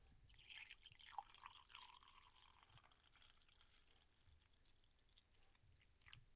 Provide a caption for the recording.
pouring coffee
Recorded with zoom iq6. with milk no sugar ;)
brew, cafe, coffee, cup, espresso, field-recording, pouring, restaurant